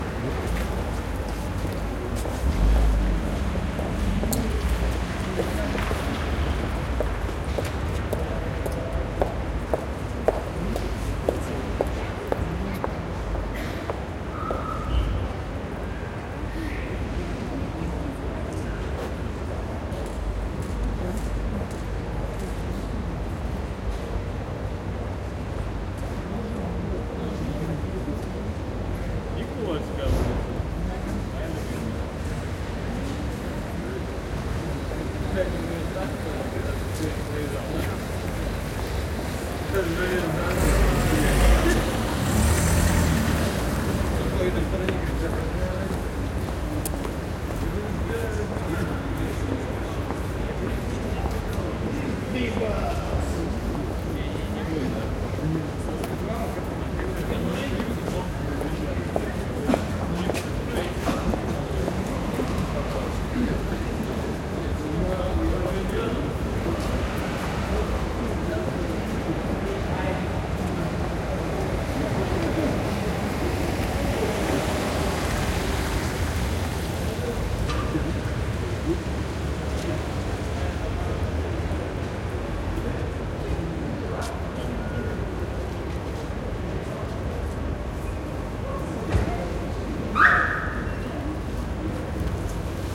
Moscow, downtown, november 2007. A street ambience with pedestrians walking by, cars passing slow. Near Red Square.
Nevaton MK47 and Sound Devices 744t.